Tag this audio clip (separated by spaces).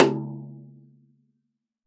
drum multisample 1-shot velocity tom